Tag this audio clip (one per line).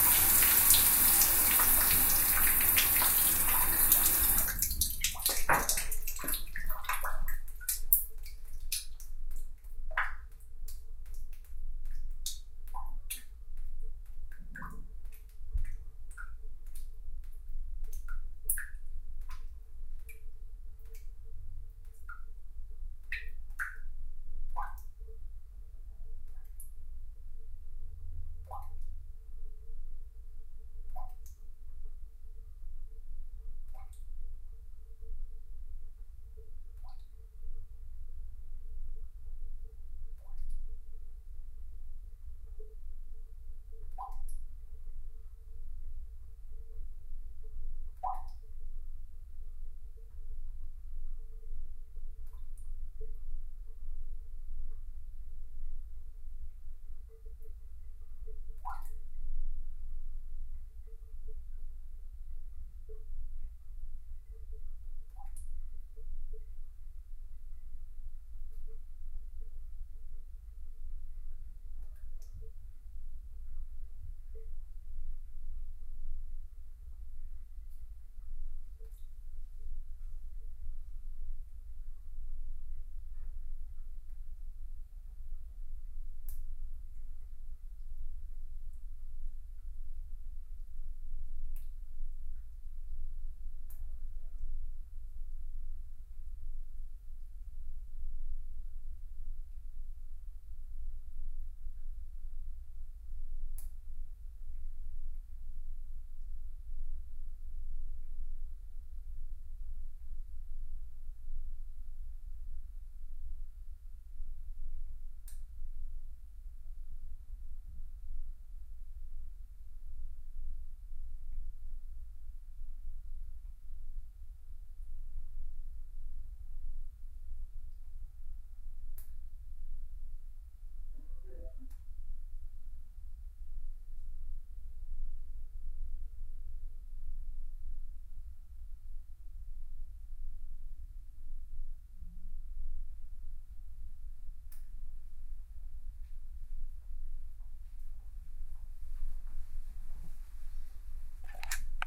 Drain
Shower
Water
Field-Recording